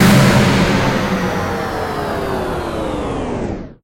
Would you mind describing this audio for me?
Shutting down a big computer. A compressed door bang and sliding pitch shift over sped up computer noise.
shut-down, power, switch, shut-off, down, energy, off, shutdown, powerdown